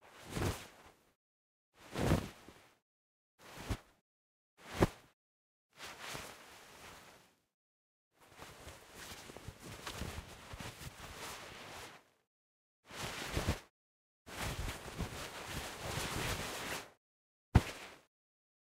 Cloth Movement - Jacket. You can find here : Throw (light/moderate) // Crumpling (light/moderate) // Movement (light/moderate - short/long) // PickUp.
Gear : Rode NT4 - Zoom H5
fabric, cloth, rodent4, clothes, movement, zoomh5